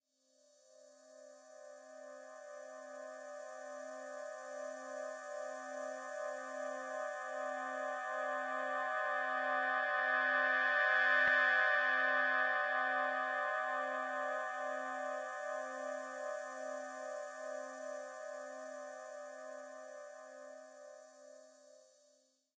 Luminize Moody fade in and out
drum and bass FX atmosphere dnb 170 BPM key C
170, atmosphere, bass, BPM, C, dnb, drum, FX, key